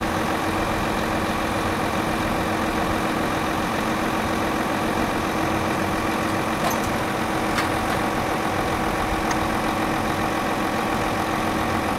working-truck-waiting-outside
Several excavators digging and loading sand in a truck